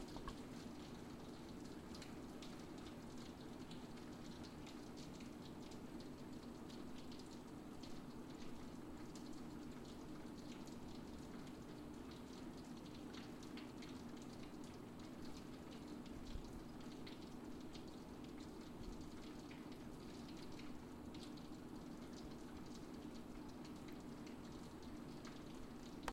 INT RainAgainstWindowPane
Rainfall on window pane ambient using Zoom H4n onboard mic.
ambient, glass, house, interior, rain, rainfall, walla, window